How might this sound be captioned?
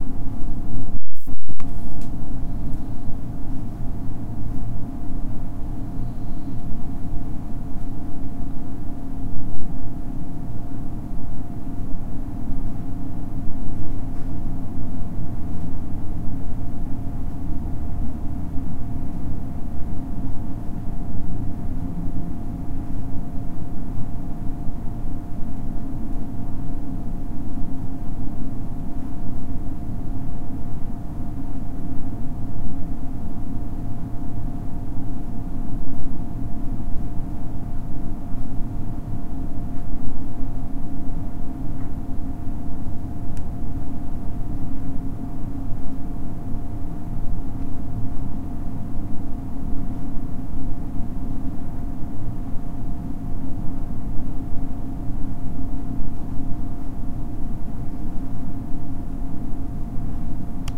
office room acoustic